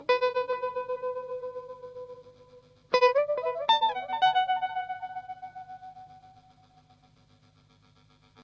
tremolo guitar delay
A small lick guitar bit which was been modulated and loads of tremolo added.
Used a marshall vintage 8080 combo with a shure sm58 miking it